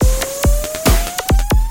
ATTACK loop 140 bpm-28
are all part of the "ATTACK LOOP 6" sample package and belong together
as they are all variations on the same 1 measure 4/4 140 bpm drumloop. The loop has a techno-trance
feel. The first four loops (00 till 03) contain some variations of the
pure drumloop, where 00 is the most minimal and 03 the fullest. All
other variations add other sound effects, some of them being sounds
with a certain pitch, mostly C. These loop are suitable for your trance
and techno productions. They were created using the Waldorf Attack VSTi within Cubase SX. Mastering (EQ, Stereo Enhancer, Multi-Band expand/compress/limit, dither, fades at start and/or end) done within Wavelab.